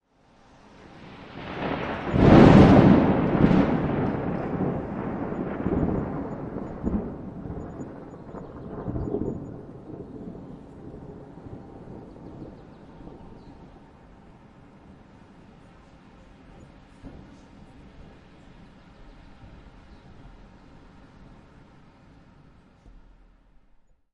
Recorded in Tallinn(Estonia) by Tascam DR 44W
Summer Thunderstorms and Rain